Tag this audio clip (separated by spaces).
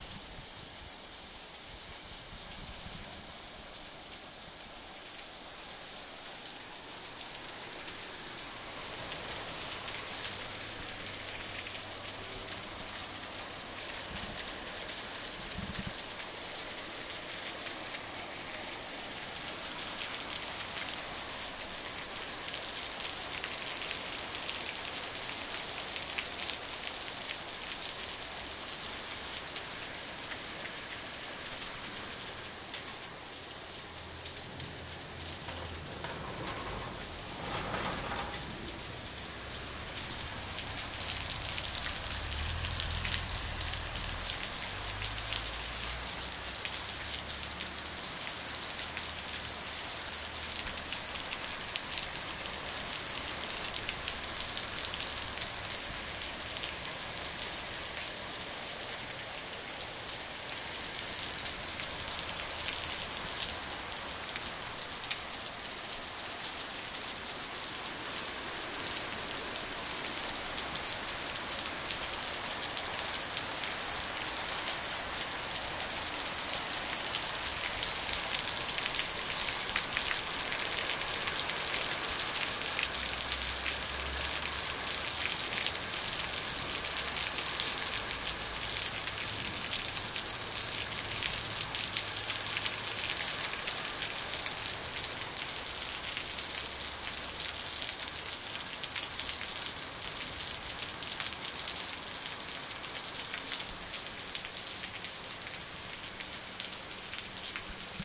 water-drips Rain background-noise City Ambiance rain-noise